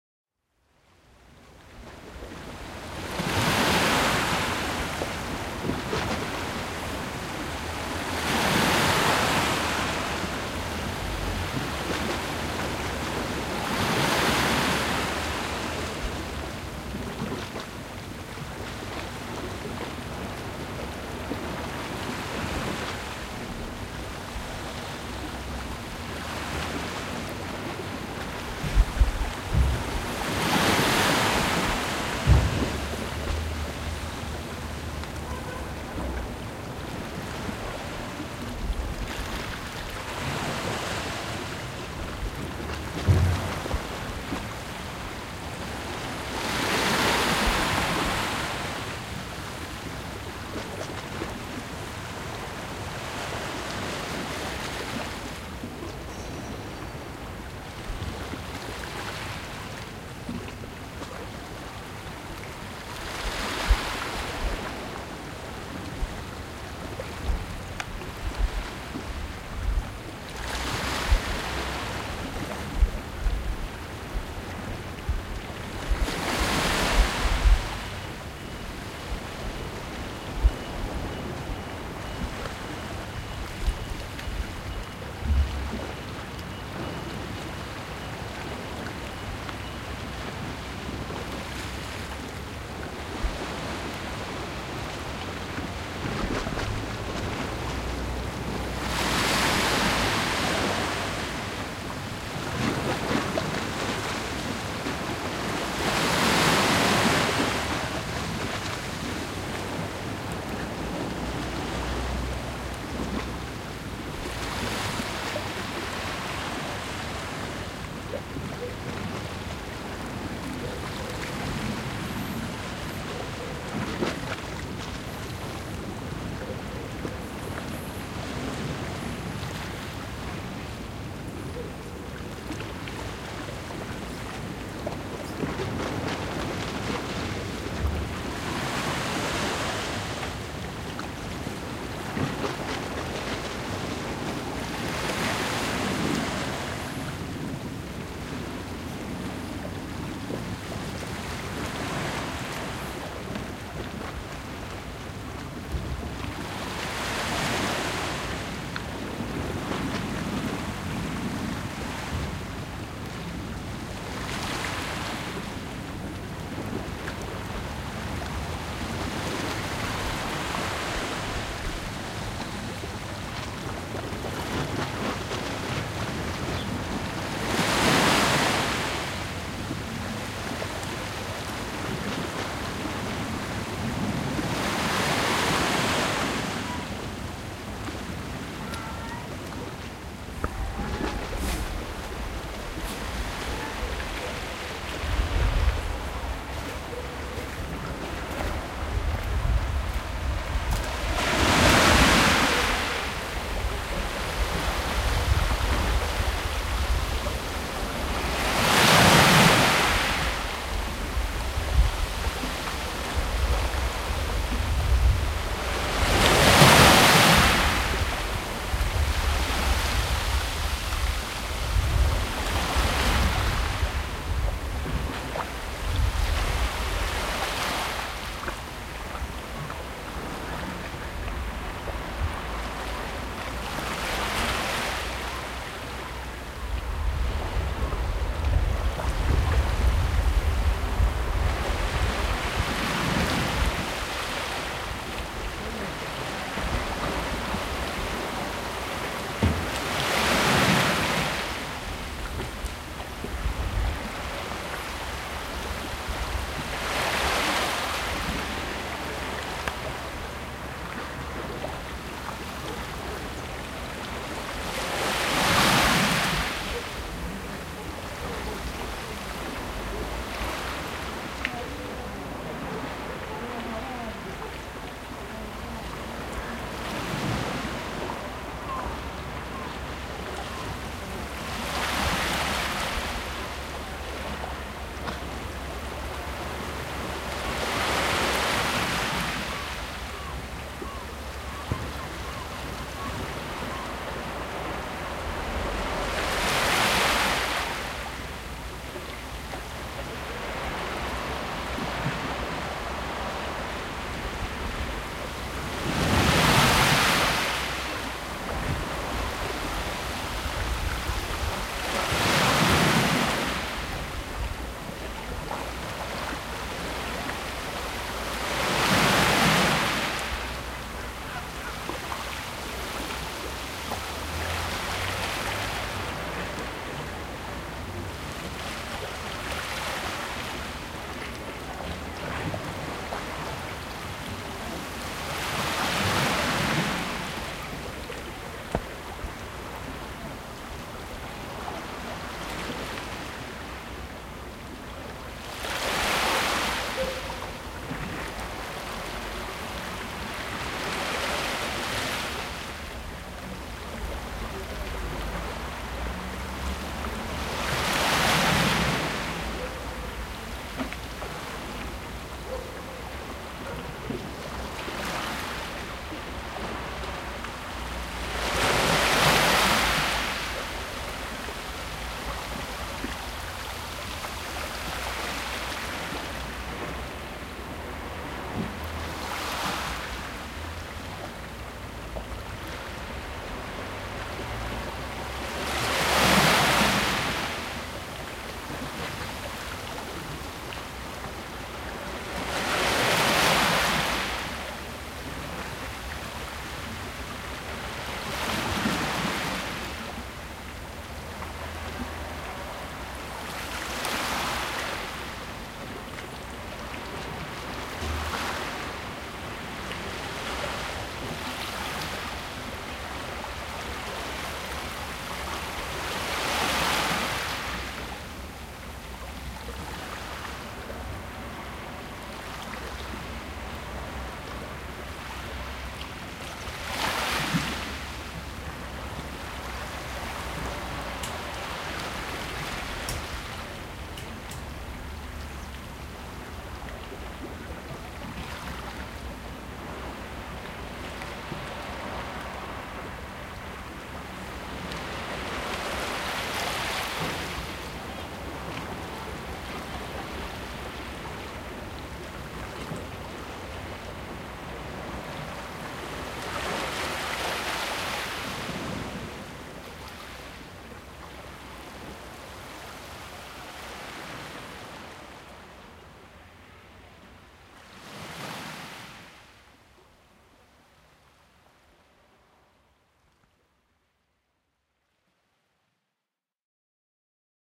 [003] On a path
date: 2011, 30th Dec.
time: 10:45 AM
gear: Zoom H4 + Rycote MINI Windjammer
place: Castellammare del Golfo (Trapani)
description: Environmental Recording of the sea by a small road next to the Castle.
Castellammare-del-golfo, leaves-rustling, nature, sea, Trapani, waves